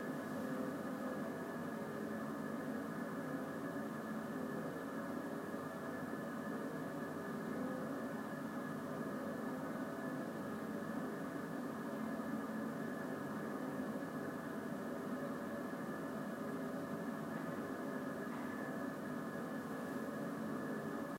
atmo drone3
Yet another record from the subway.
industry, mono